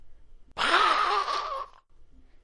Goblin Scream
The scream of a goblin.
Scream, Goblin